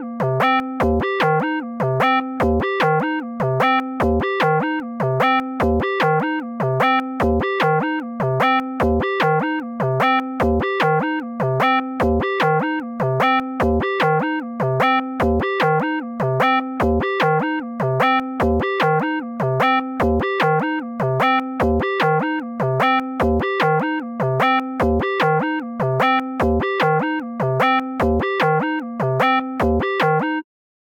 04 Good Loop
Arpio5 Synth Arpeggiator
Arpeggiator, Arpio5, Synth